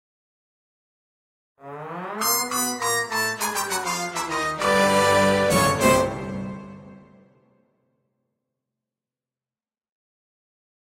Funny TV Moment
Just a brief blurb for comic moments in TV that I composed.
chromatic, tv, funny